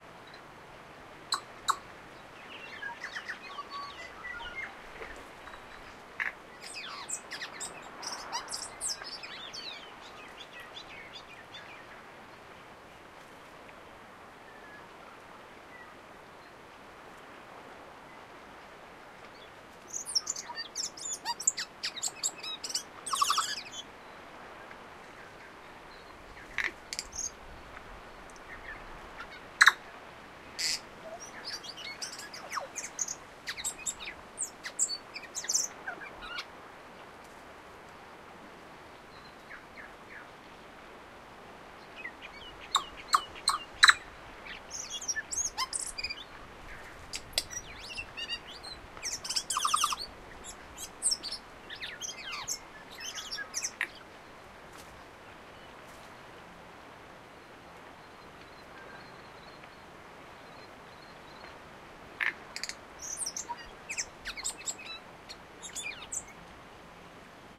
Tui bird, distant Tui birds, background waves
A New Zealand Tui bird singing. The bird sits in a bush close-by and produces various colourful noises with short breaks. Other, more distant Tuis answering. In the background the waves of the ocean, slight wind. The Tui hops from branch to branch. Towards the end a soft cricket.
Post-processing: a soft low-shelf to weaken the noise of the wind.
New-Zealand, bird, field-recording, nature, birdsong